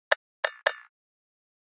a minimal loop percussion